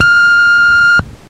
Snippet from prank call tapes in early 90's. Sound of beep at beginning of each message or memo on an old cassette answering machine. Lots of tape hiss for your listening pleasure.